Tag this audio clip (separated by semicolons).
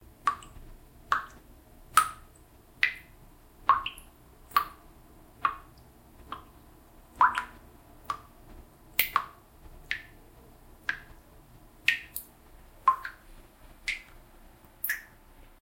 water-drop; water; drop; dripping; drip; waterdrops; drops